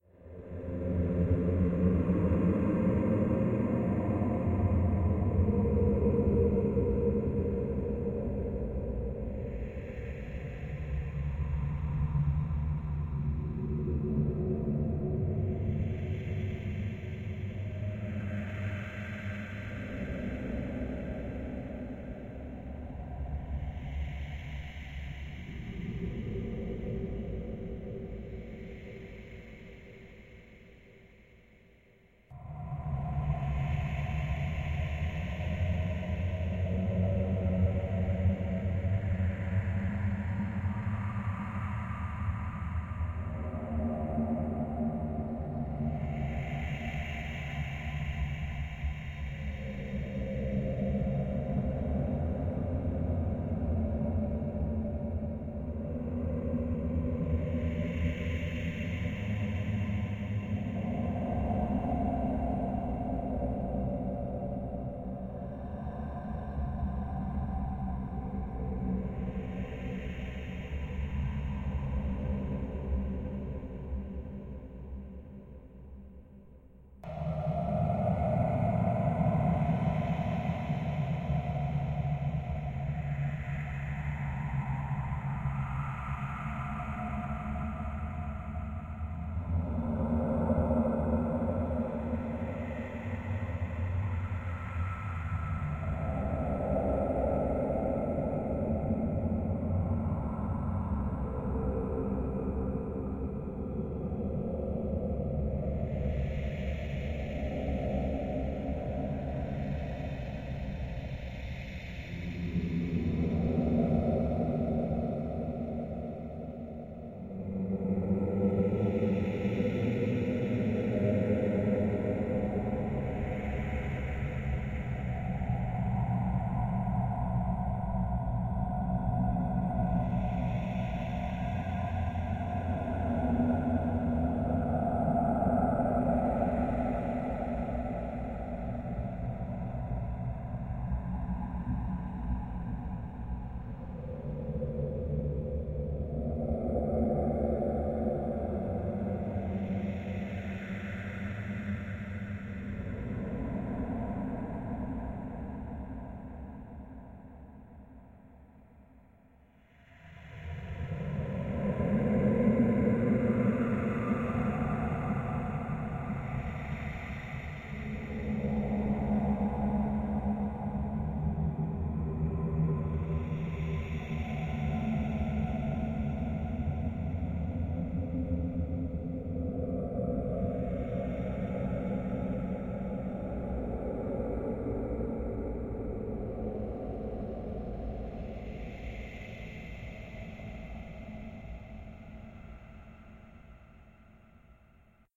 Horror Background Sound or Music
A generic horror track of distorted whale-like moaning. Generally loops well.
Name: Horror Game Background Sound/Music (Loop)
Length: 3:14
Type: Background Loop